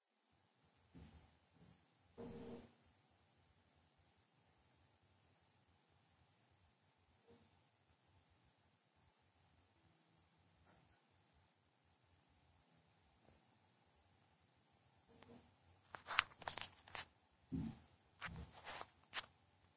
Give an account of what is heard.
bathroom lights
ambient, buzz, hum